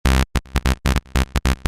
Bass 07 150bpm
150, 150bpm, Bass, Dance, Techno